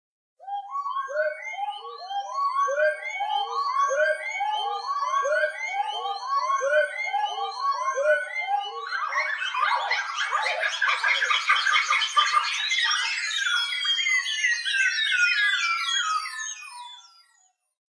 Song Thrush processed
A little snip of Reinsamba's great Song Thrush recording - repitched, noise-filtered, convolution verb added, some delay...enjoy.
birdsong, processed, remix, thrush